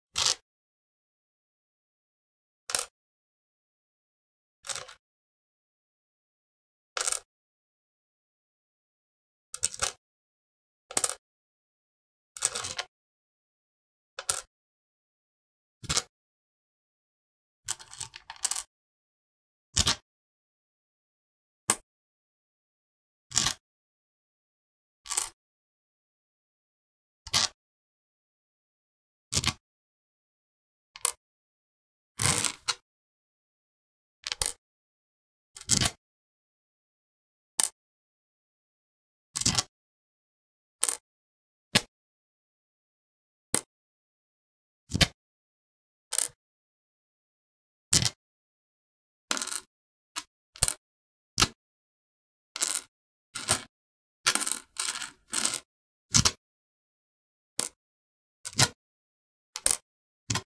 Münzen - Münze legen und aufheben, Steinboden
Putting down a coin onto a stone floor, picking up a coin from a stone floor
putting-down, picking-up, coin, field-recording, floor